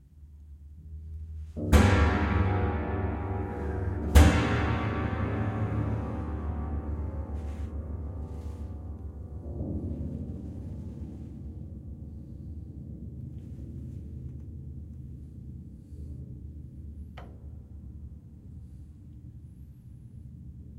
duble stab
2 BIG ONES 2 track recording of an old bare piano soundboard manipulated in various ways. Recordings made with 2 mxl 990 mics, one close to the strings and another about 8 feet back. These are stereo recordings but one channel is the near mic and the other is the far mic so some phase and panning adjustment may be necessary to get the best results. An RME Fireface was fed from the direct outs of a DNR recording console.
acoustic, effect, fx, horror, industrial, percussion, piano, sound, soundboard, sound-effect